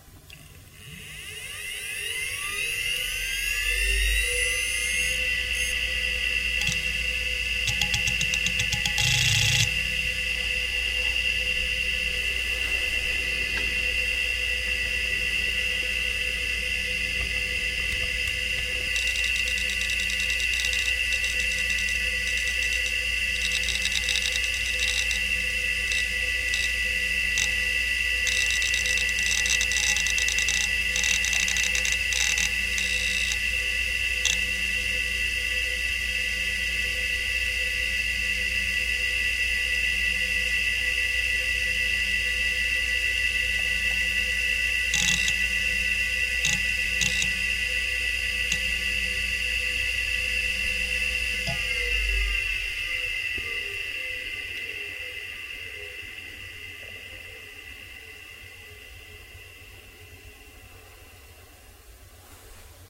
A Quantum hard drive manufactured in 1998 close up; spin up, writing, spin down.